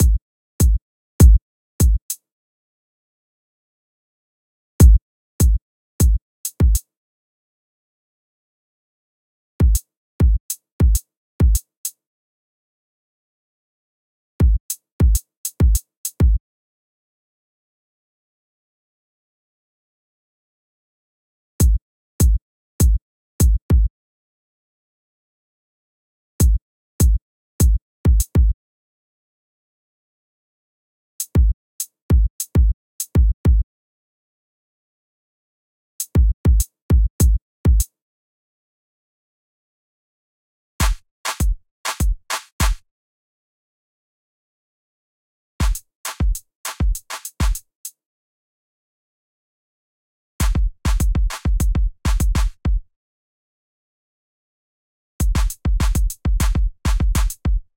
Martín Palmezano Ejercicio 9
clase, ejercicio